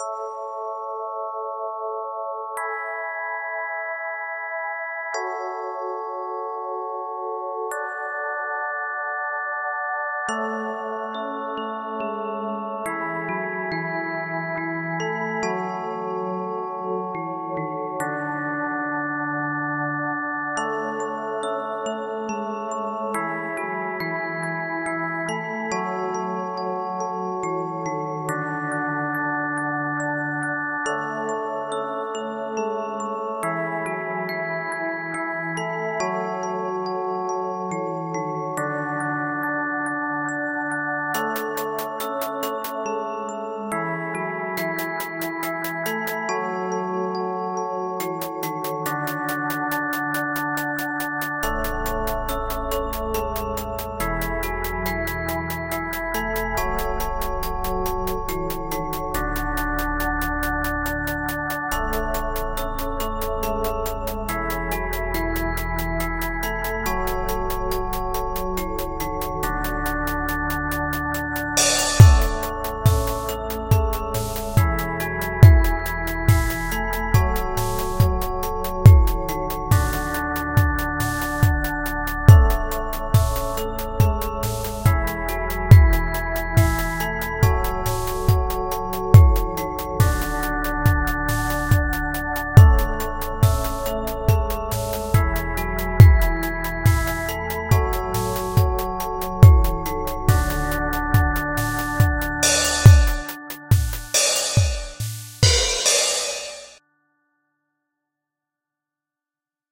the musican
I made this sound with lmms. Just listen and decide if you like it or not... I used three SynthAddSubFX plugins and some other like kicker too. You dont have to credit. Write in the comments for what you used it!
electronic, Ambiance, sci-fi, music, atmosphere